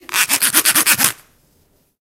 mySound SPS Aurelie
Sounds from objects that are beloved to the participant pupils at the Santa Anna school, Barcelona. The source of the sounds has to be guessed.
CityRings, Belgium, mySound, Aurelie, Stadspoortschool